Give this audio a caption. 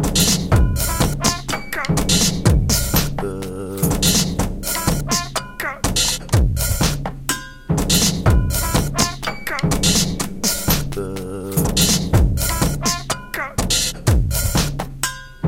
Human funk 4
The sounds in this loop are not edited, only volume and/or length, so you hear the raw sounds. I cannot credit all the people who made the sounds because there are just to much sounds used. 124BPM enjoy ;)
groove, human, loop, strange, weird